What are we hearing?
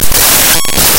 Harsh digital noise samples made with Max/MSP openany~ object, which loads any files into audio buffer.
openany, harsh, digital-noise, noise